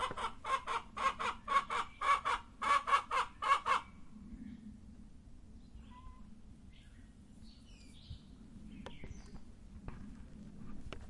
!rm chicken noises
chick noises recorded with Zoom H4n recorder.
chicken, cluck, noise, rooster